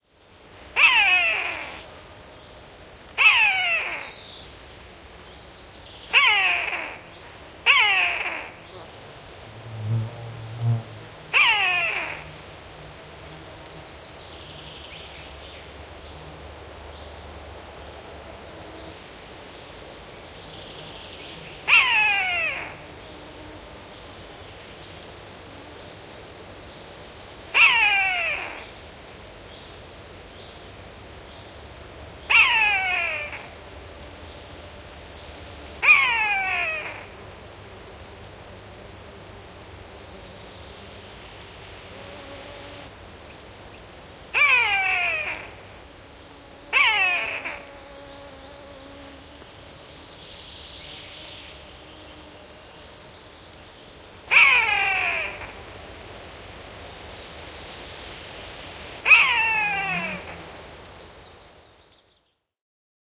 Hiirihaukka, huuto / Buzzard calling in the forest, insects and some small birds in the bg, trees humming (Buteo buteo)
Hiirihaukka ääntelee, huutaa metsässä. Taustalla hyönteisiä, vähän pikkulintuja ja puiden kohinaa. (Buteo buteo)
Paikka/Place: Suomi / Finland / Parikkala, Uukuniemi
Aika/Date: 07.07.1991
Animals, Bird, Birds, Buzzard, Call, Field-Recording, Finland, Finnish-Broadcasting-Company, Haukat, Haukka, Hawk, Hiirihaukka, Huuto, Linnut, Lintu, Luonto, Nature, Soundfx, Suomi, Tehosteet, Yle, Yleisradio